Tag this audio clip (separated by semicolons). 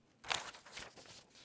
book; page; turning